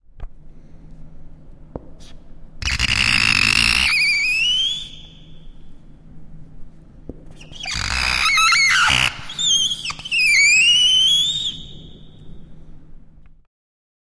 STE-010 Writing Hard on Board with Marker
Writing hard on a whiteboard with a marker, producing a characteristic disgusting sound.
UPF-CS12, board, campus-upf, disgusting, marker, whiteboard